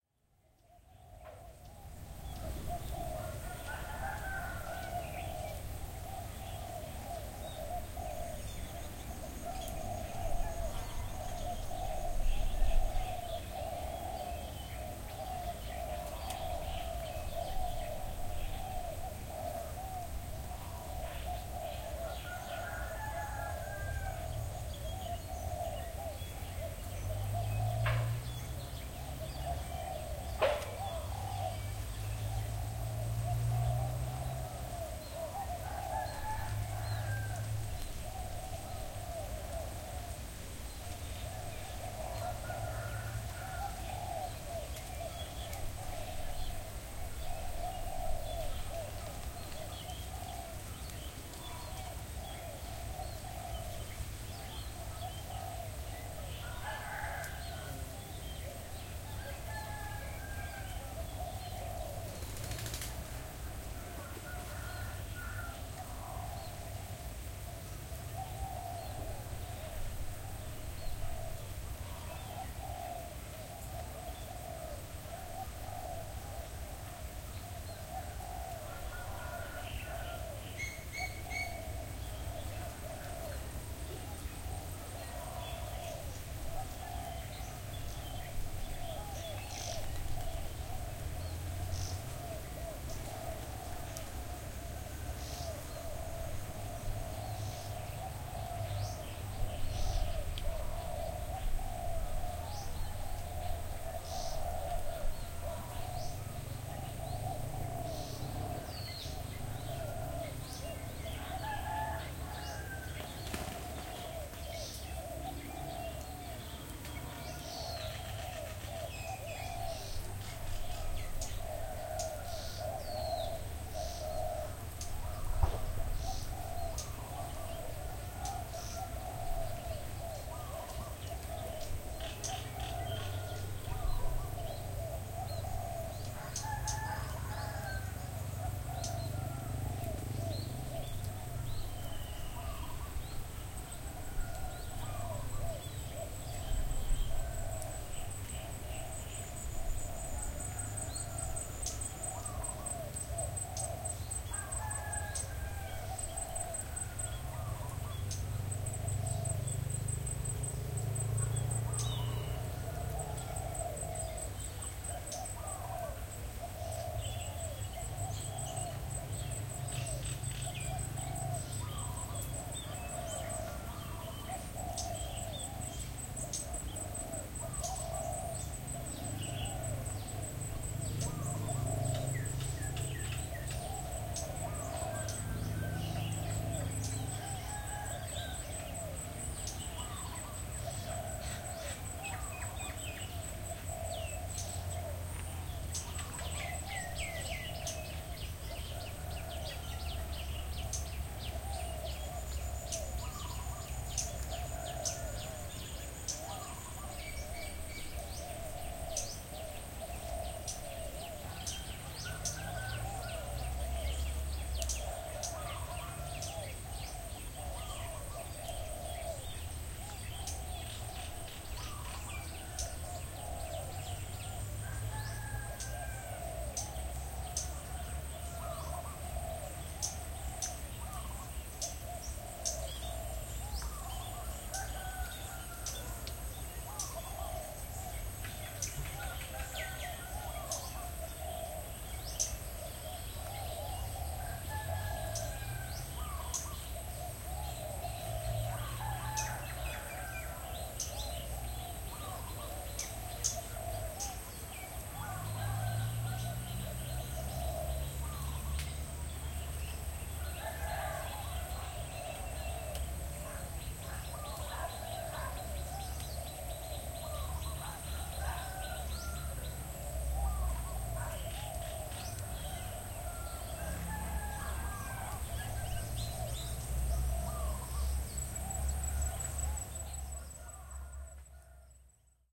Recorded ambient sound in my garden in Thailand. See file name for time of day. Recorded by Alex Boyesen from Digital Mixes based in Chiang Mai production and post production audio services.